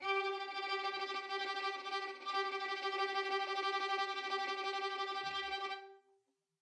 One-shot from Versilian Studios Chamber Orchestra 2: Community Edition sampling project.
Instrument family: Strings
Instrument: Solo Violin
Articulation: tremolo
Note: F#4
Midi note: 67
Midi velocity (center): 31
Room type: Livingroom
Microphone: 2x Rode NT1-A spaced pair
Performer: Lily Lyons
fsharp4, midi-note-67, midi-velocity-31, multisample, single-note, solo-violin, strings, tremolo, violin, vsco-2